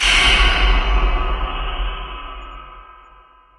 Kind of frightening impact that sounds like some synthetic orchestra hit, with a longer release; it contains a bit of infrabass.